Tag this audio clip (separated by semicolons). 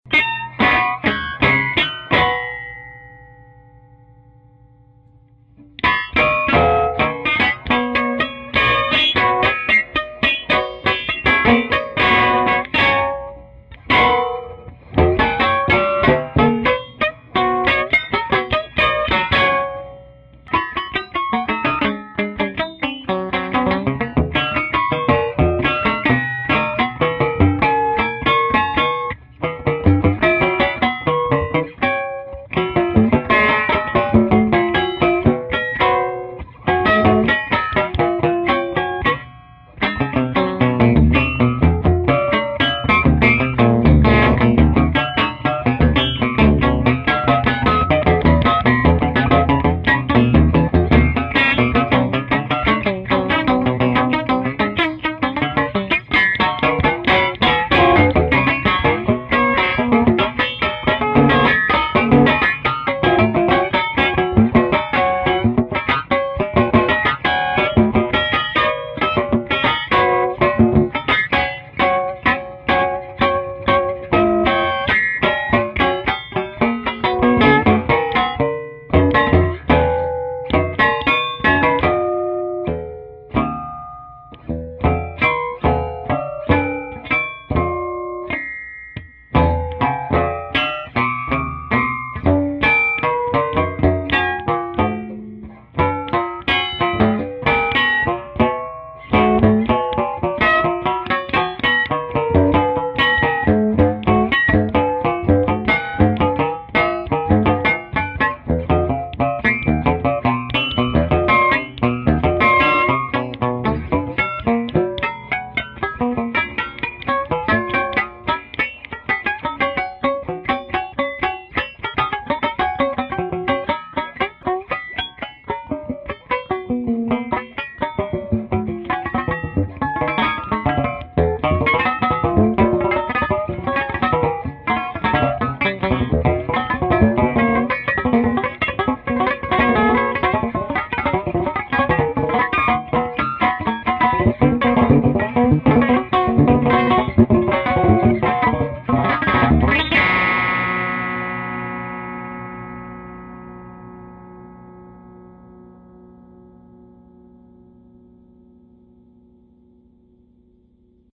prepared-guitar; guitar; lo-fi